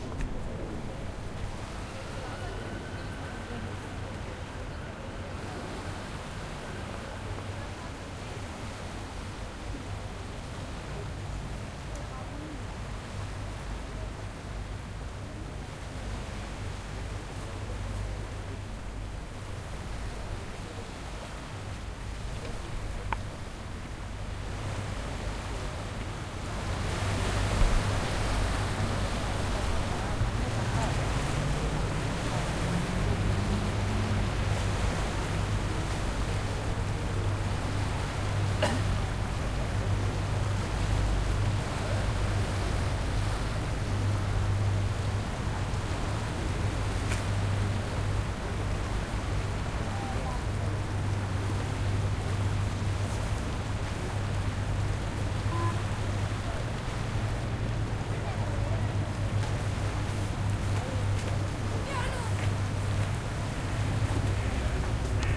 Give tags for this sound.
Angleterre
england
fleuve
river
tamise
thames

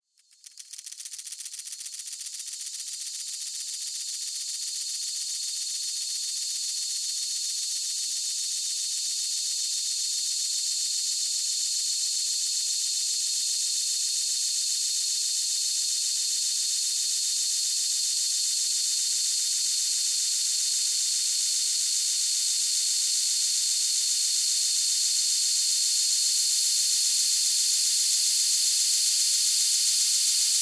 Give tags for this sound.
hiss
noise
white-noise
green-noise

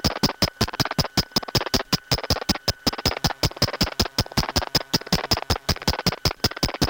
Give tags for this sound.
glitch
circuit-bent

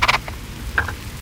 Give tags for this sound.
Foley
sample
scrape

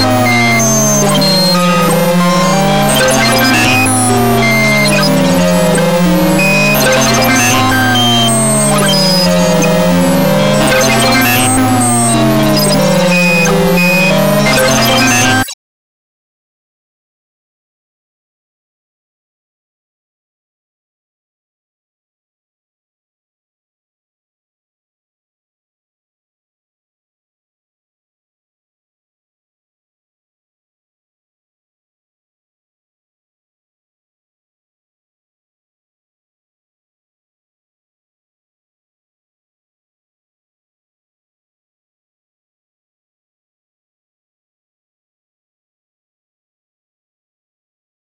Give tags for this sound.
electronic
glitch
noise

